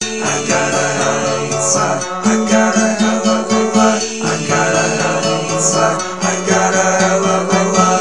HELL OF ALOT Mixdown
A collection of samples/loops intended for personal and commercial music production. For use
All compositions where written and performed by
Chris S. Bacon on Home Sick Recordings. Take things, shake things, make things.
vocal-loops, sounds, loop, melody, looping, drums, acapella, drum-beat, whistle, free, acoustic-guitar, indie, rock, piano, bass, beat, percussion, Indie-folk, voice, guitar, loops, synth, original-music, harmony, Folk, samples